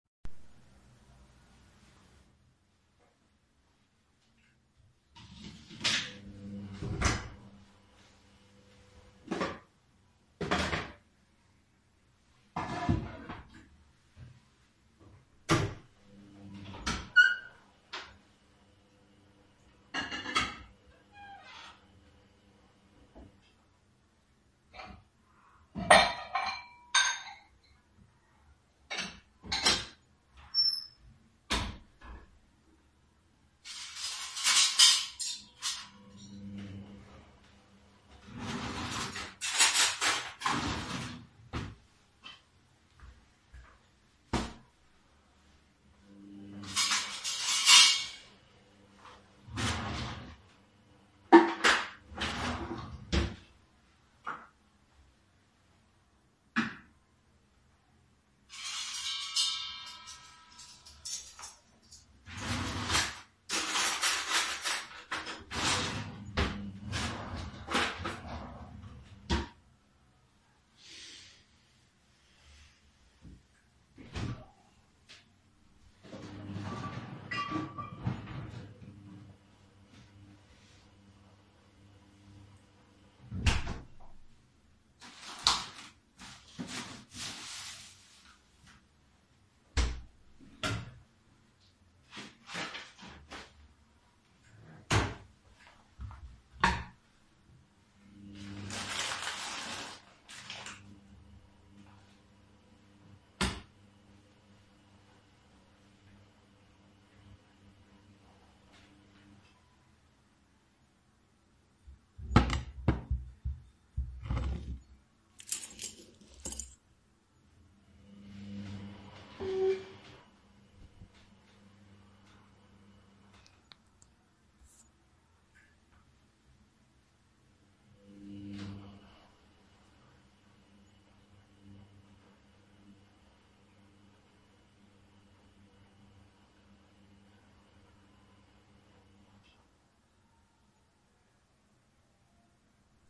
Doing stuff in the kitchen.